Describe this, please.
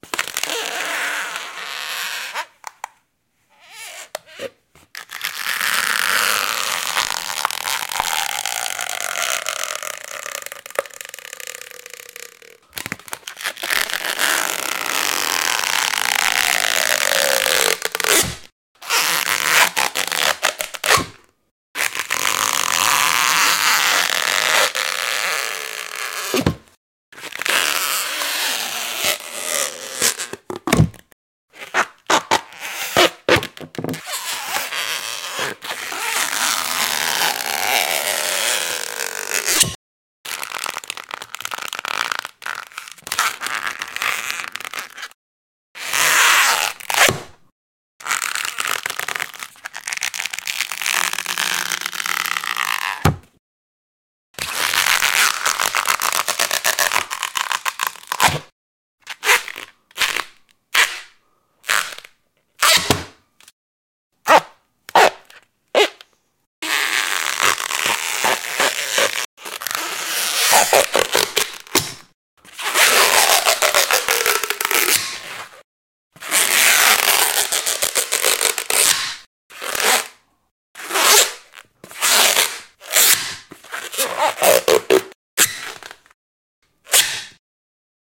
Rubber Stretch Rip 1
Ripping-stretching-rubber.Many uses from comedy to horror Live recording on Audio-technica 40 series Studio Mic Many variations. Natural room reverb.
balloon, breaking, creak, door, elastic, horror, latex, pull, rip, ripping, Rubber, sinuose, squeak, stretch, stretching, tear, wood